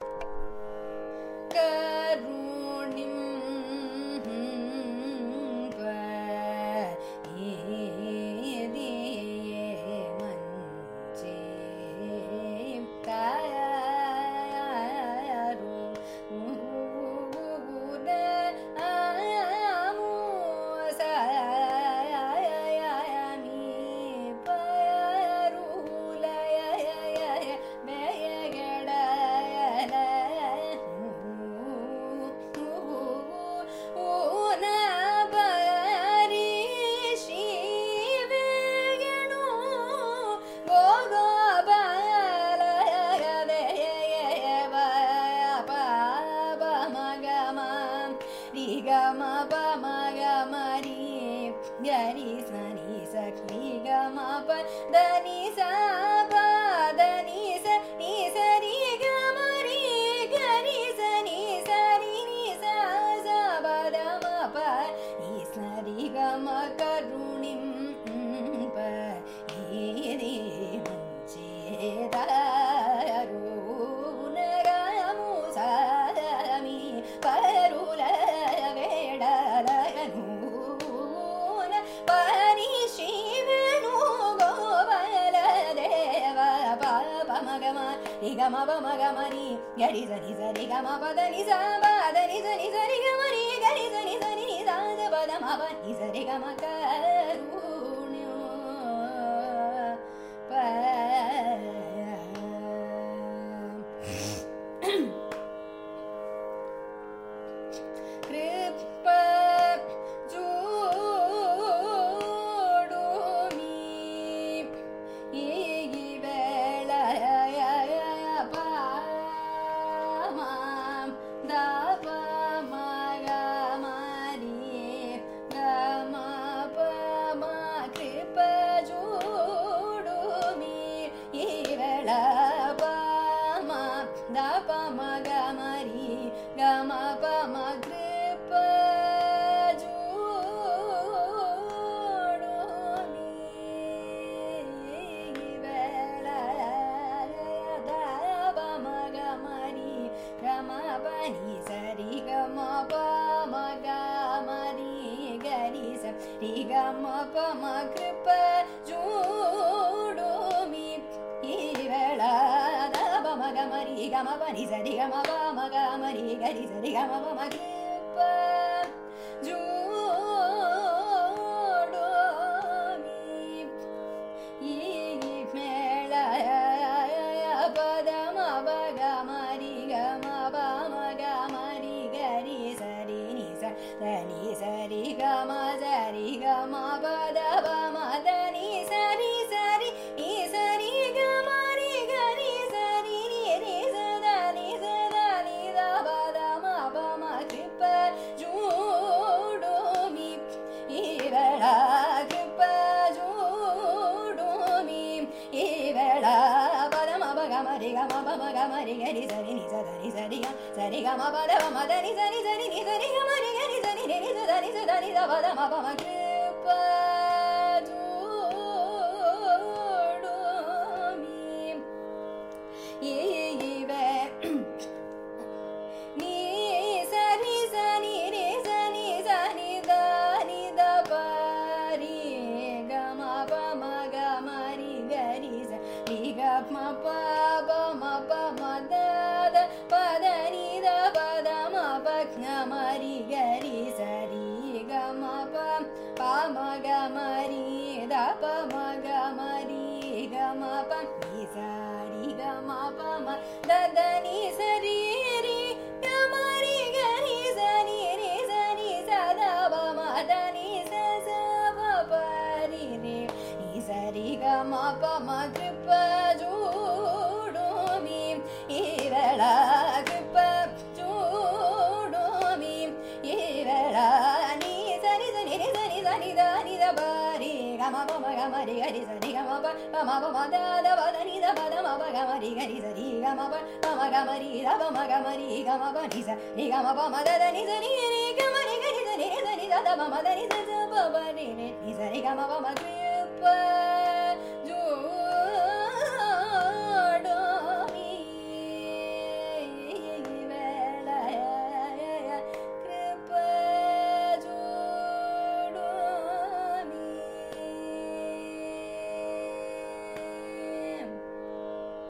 Carnatic varnam by Sreevidya in Sahana raaga
Varnam is a compositional form of Carnatic music, rich in melodic nuances. This is a recording of a varnam, titled Karunimpa Idi, composed by Tiruvotriyur Thiyagaiyer in Sahana raaga, set to Adi taala. It is sung by Sreevidya, a young Carnatic vocalist from Chennai, India.
carnatic, carnatic-varnam-dataset, compmusic, iit-madras, music, varnam